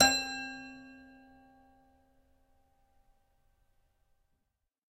multisample pack of a collection piano toy from the 50's (MICHELSONNE)